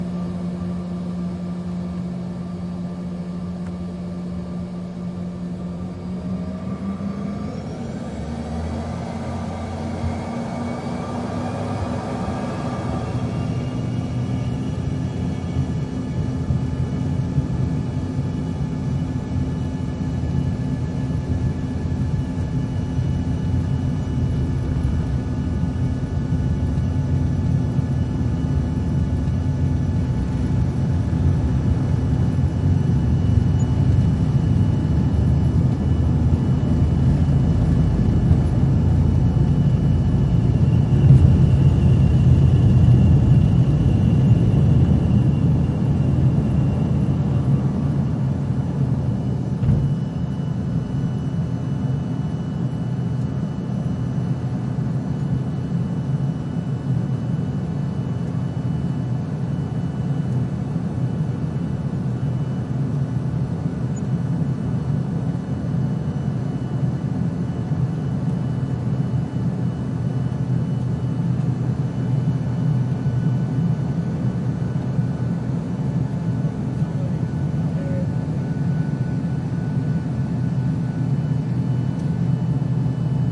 Embraer 175: Take off and climb (less engine)
Embraer 175 taxiing to runway, engines throttle up, plane takes off and ascends with less prominent engines sounds. Plane lifts from the ground when the bass subsides.
airliner, airplane, climb, embraer, engines, jet, off, plane, take